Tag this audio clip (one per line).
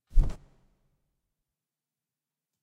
bird
dreamer
flap
flapping
fly
wing
wings